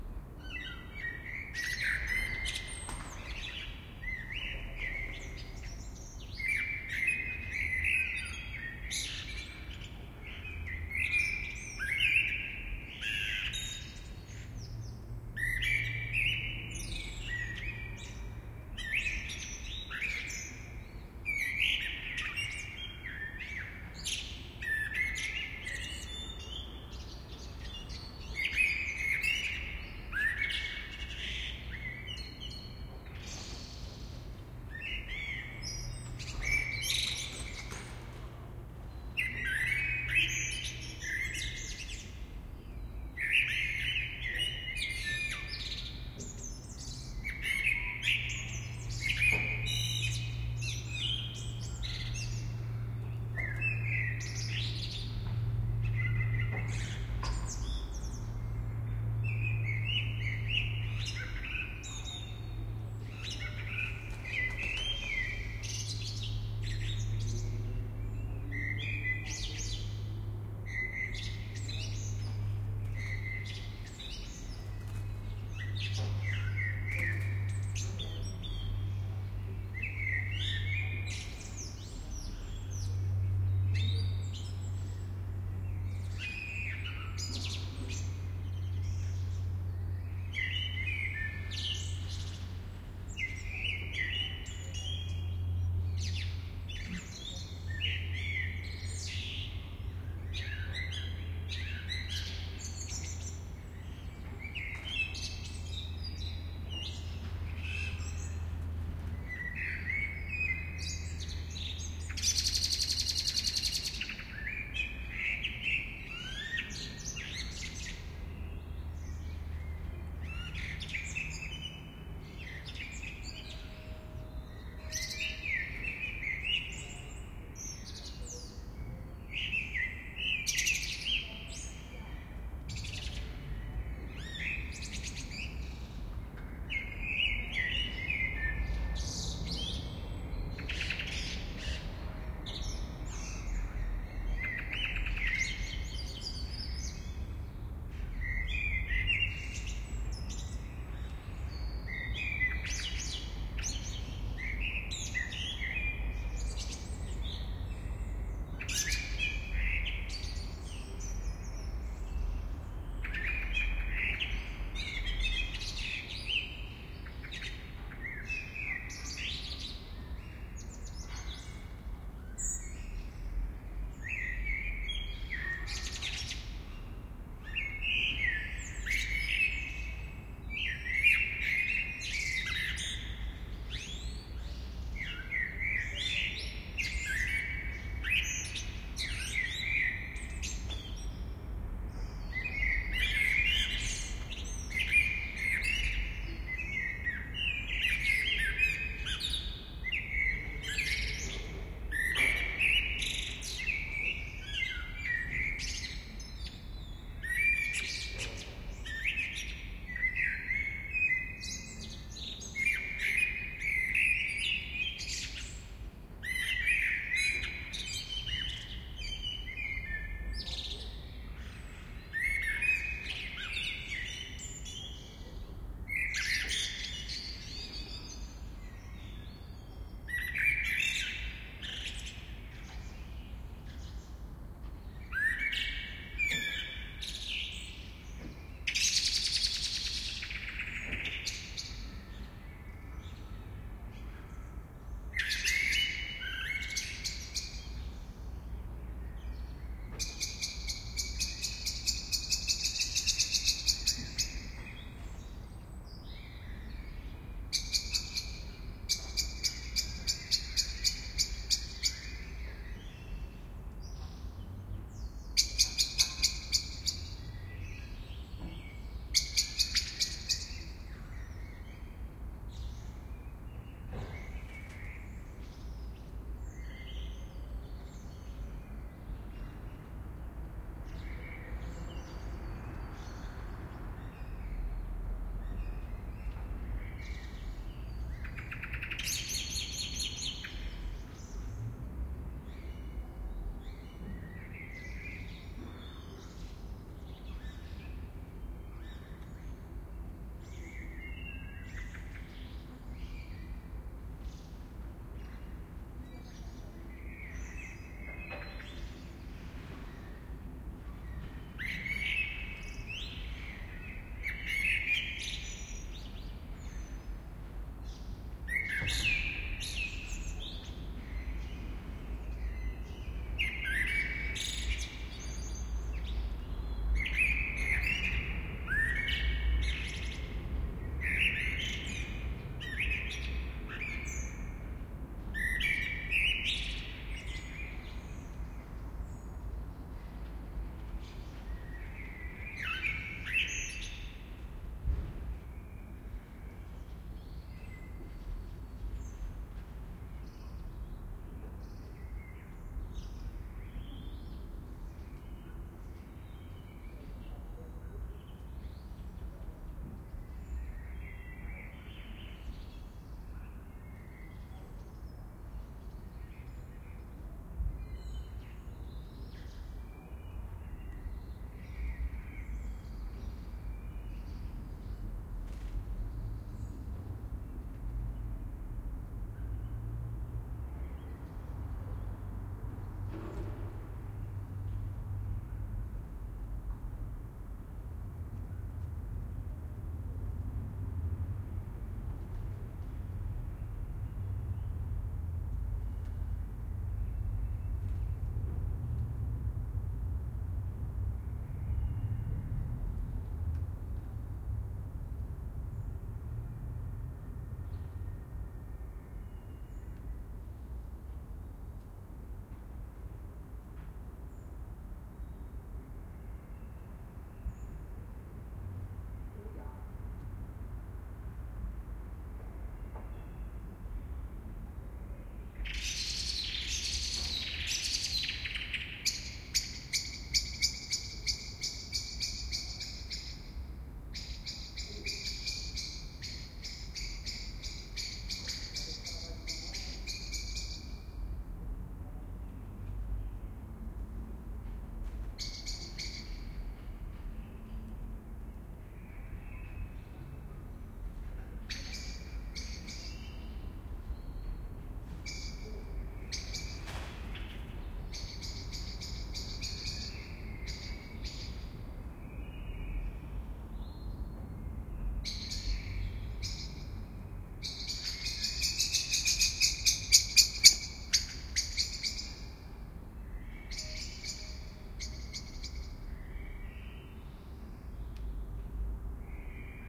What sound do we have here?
A blackbird singing in the eveningtime in town.
MKH60 microphones into Oade FR2-le.